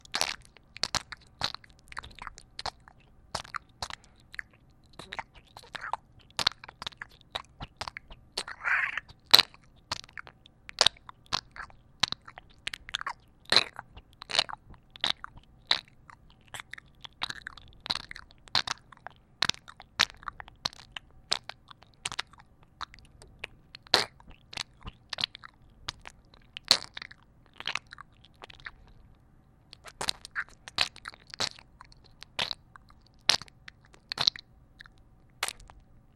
The sound was created by the sucking of saliva inside a mouth.
squish, squash, mouth